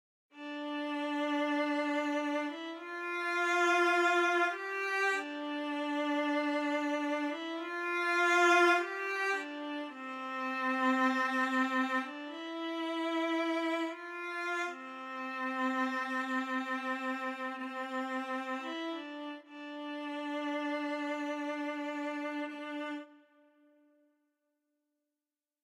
Synthethic Violin
My keyboard Violin sound recorded with Music studio. Short phrase in D-